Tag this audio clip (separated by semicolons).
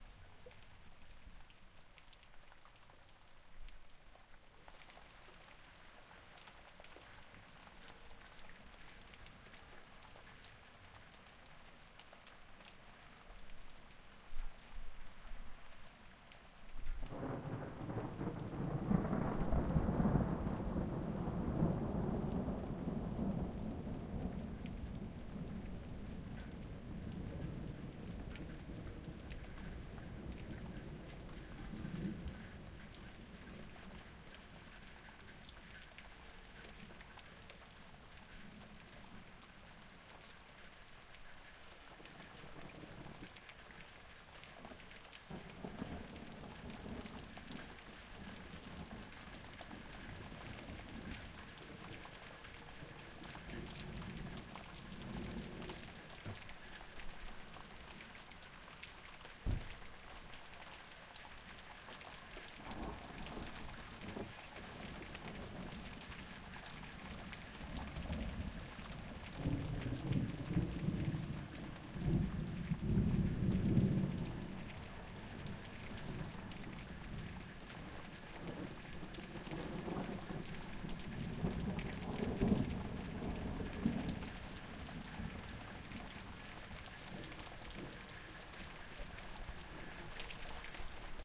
lightning; rainstorm; storm; thunder-storm; nature; field-recording; thunderstorm; thunder; rain; weather